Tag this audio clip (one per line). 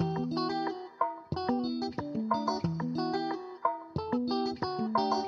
91-bpm
beat
drum-loop
drums
funky
guitar
latin
loop
rap
rhythm